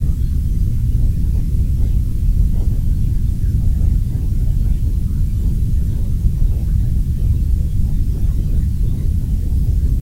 My spaceship interior with far noise like aliens speaking (?)
Created with Audacity.
space, spaceship